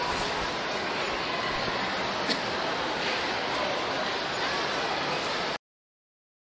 washington naturalhistory sealife1
Life of the Sea exhibit inside the Smithsonian Museum of Natural History recorded with DS-40 and edited in Wavosaur.
field-recording, natural-history-museum, road-trip, summer, travel, vacation, washington-dc